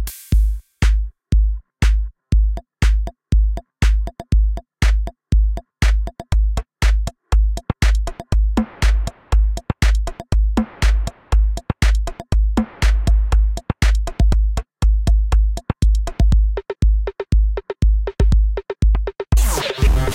Easy Electronic Beat 1
A simple beat.
Beat, Easy, Low-Key